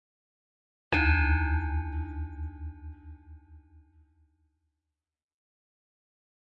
notification 2 (bad)
A negative game/computer sound
bad, game, notification, pick-up